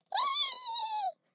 monstro feito por humano - human voice
bichao, monster, monstro, bichinho, monstrao, bicho